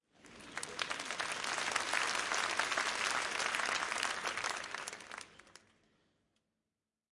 crowd applause theatre
applause, crowd, theatre